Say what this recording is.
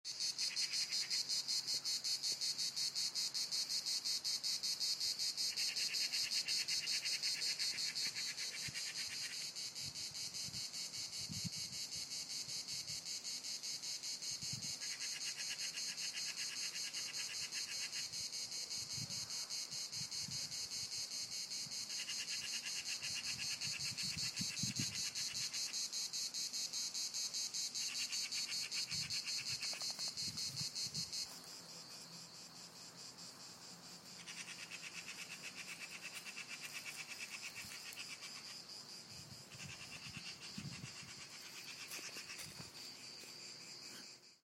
grillos en Lloret
grillos, nature, insectos, field-recording